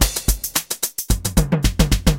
Drum loop made with DT-010 software drum machine.
bpm, drum, loop, 110